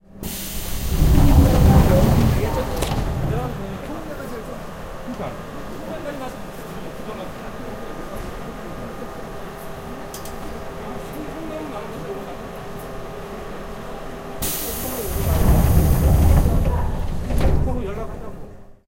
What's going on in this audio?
0007 Metro door open close
Metro doors open close. People talk in Korean
20120112